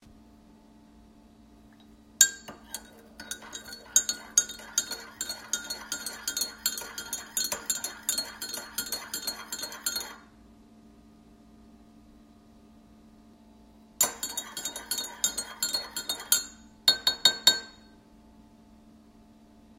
Stirring Sugar In My Coffee
Stirring sugar with a small spoon. Recorded with iPhone 8.
drink, liquid, sound-effects